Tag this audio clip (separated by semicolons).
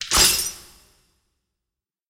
chest; Coin; collect; drop; game; item; Money; pick-up; Store; Video-Game